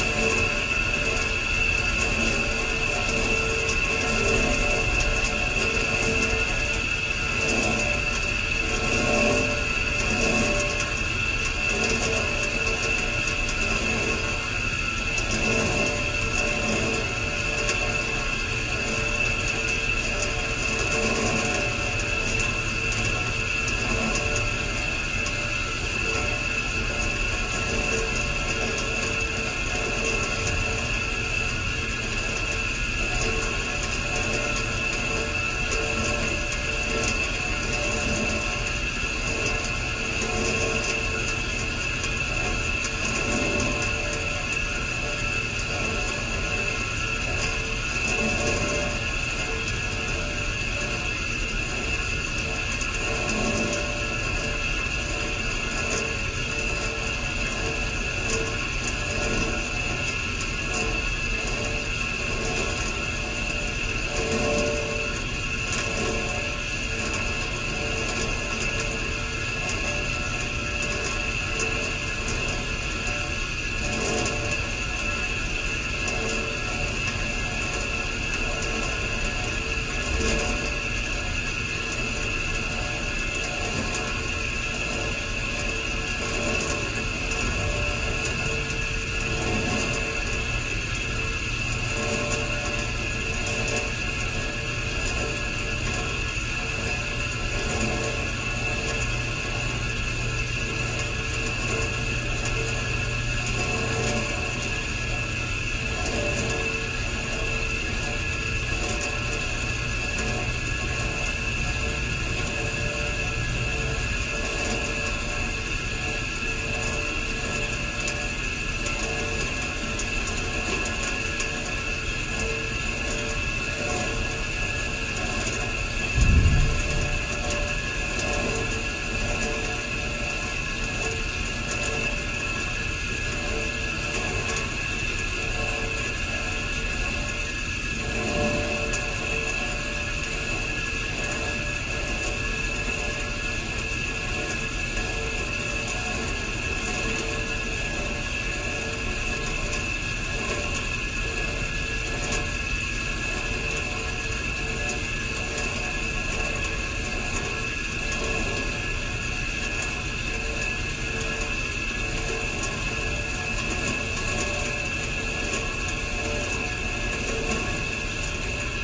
ambient noise (radiator)
Recording of ambient room noise with a relatively uncooperative radiator.
ambience, MTC500-M002-s14, radiator, room-noise